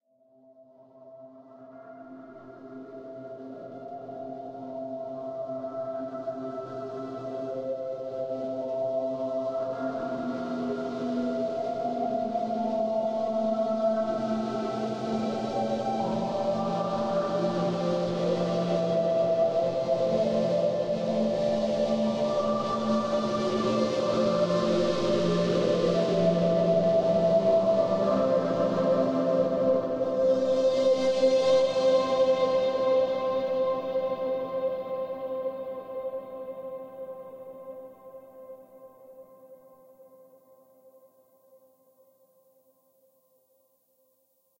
music heard in the distance